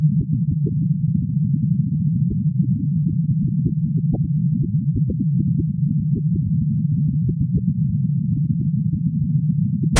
Read the description on the first file on the pack to know the principle of sound generation.
This is the image from this sample:
Compressed again to boost volume. Left channel now has sound instead of noise.